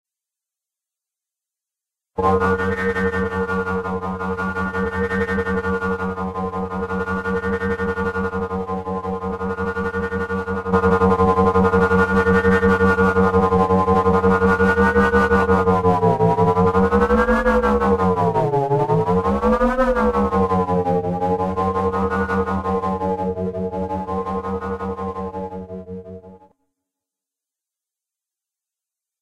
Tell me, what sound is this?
FactoryFusionator 3: Machinery used to mfg transportation pods for the SynGlybits.